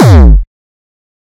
Distorted kick created with F.L. Studio. Blood Overdrive, Parametric EQ, Stereo enhancer, and EQUO effects were used.
bass, beat, distorted, distortion, drum, drumloop, hard, hardcore, kick, kickdrum, melody, progression, synth, techno, trance